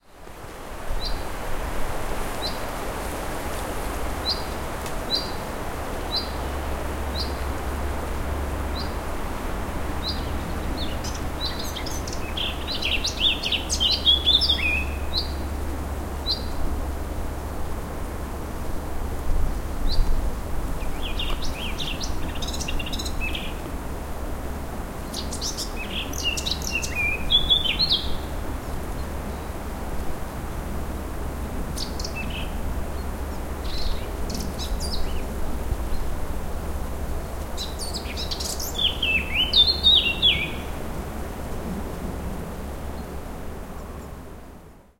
BIRDS IN COUNTRYSIDE – 2
Sound atmosphere of birds in French countryside. Sound recorded with a ZOOM H4N Pro and a Rycote Mini Wind Screen.
Ambiance sonore d’oiseaux dans la campagne française. Son enregistré avec un ZOOM H4N Pro et une bonnette Rycote Mini Wind Screen.
ambiance, ambience, ambient, atmosphere, background-sound, bird, birds, birdsong, countryside, field-recording, forest, general-noise, nature, soundscape, spring